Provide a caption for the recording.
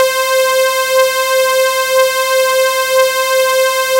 Synth Lead 1 (Looped Version)
This is just a version of "Synth Lead 1" that loops better.
analog, cool-synth, electronic, keyboard, saw-lead, sawtooth, saw-wave, synth, synthesizer, synthesizer-lead, synth-lead, wave